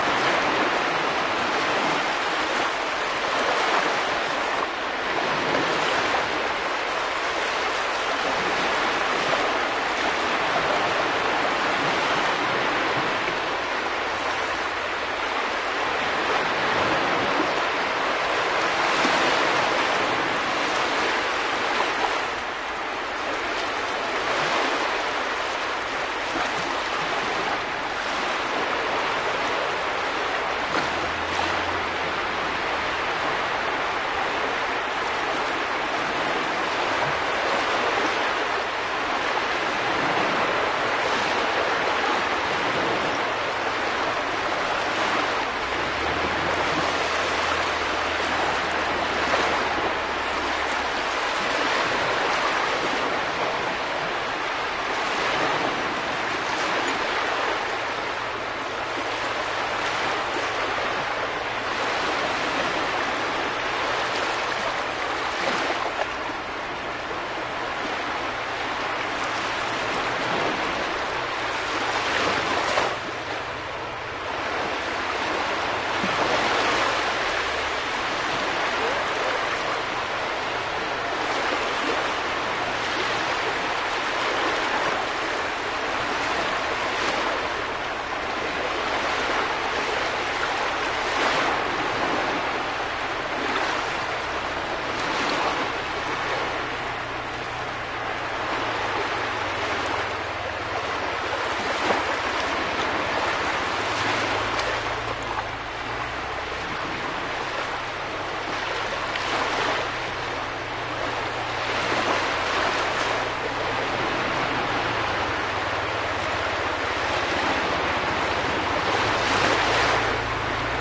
small sea waves at Yyteri beach (Baltic sea, Finland). Shure WL183 mics into a Fel preamp and Olympus LS10 recorder.
waves, beach